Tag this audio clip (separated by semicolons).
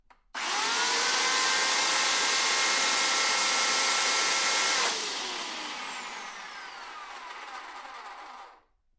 Czech; chainsaw; CZ; Panska